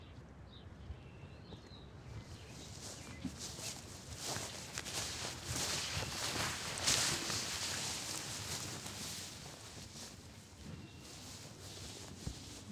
Mono recording on to 702 Hard Disk Recorder with Audio Technica AT875R microphone of a kid walking through the grass.
foot-noise, exploring, walking